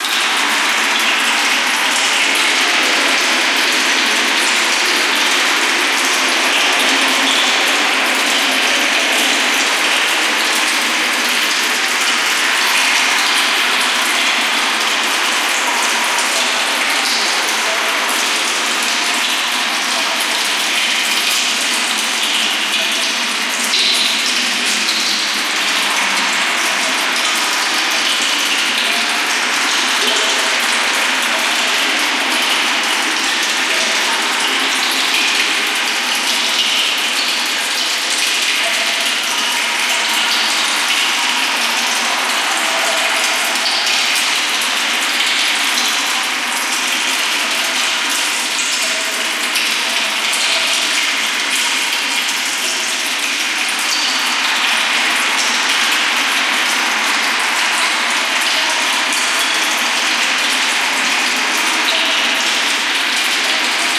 reverbed subway